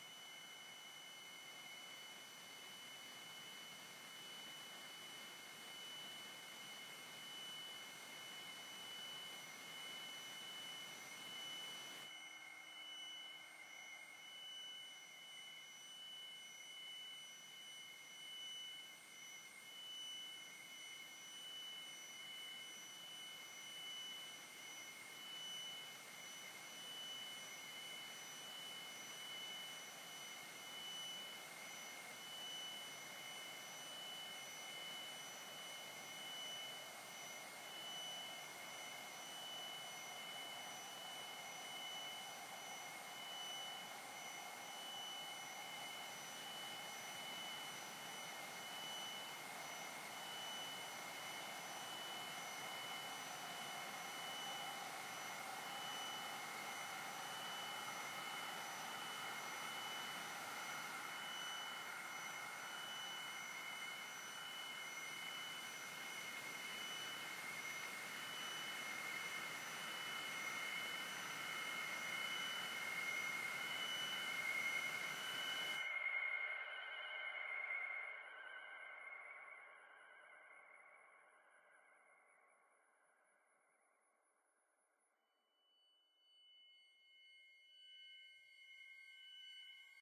op-9 noise drone
Noise drone with high frequency sinusoidal sounds.
drone, high-frequency, fm, squelchy, noise, ambient, synthesis, sin